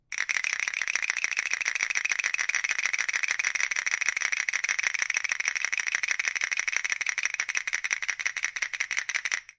Recorded using clappers I found in the studio into Pro Tools with an AKG 414 mic.